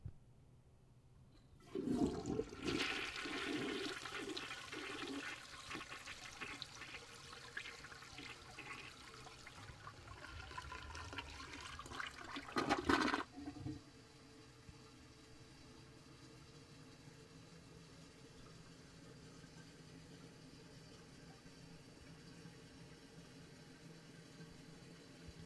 just messing around with the toilet